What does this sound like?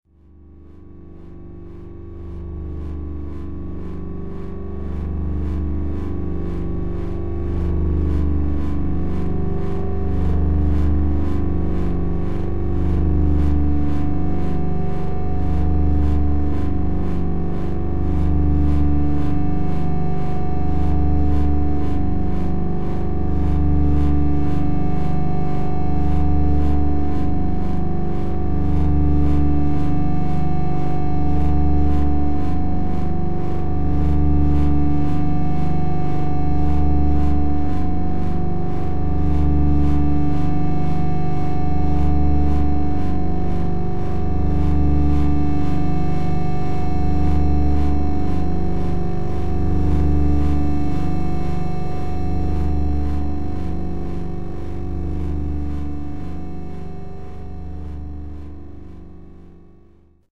precession demo 1
60 seconds drone made with "precession", a drone generator I'm building with reaktor.
reaktor, electronic, drone